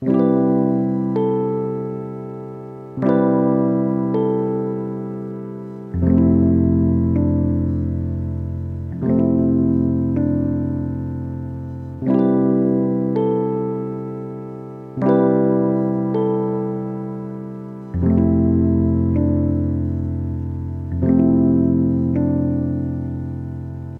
Lofi Downtempo Keyboard / Rhodes Loop Created with Korg M3
80 BPM
Key of C Major
Portland, Oregon
May 2020
loop, keyboard, dark, chill, korg, lofi, rhodes, digital, downtempo, 80BPM, piano, keys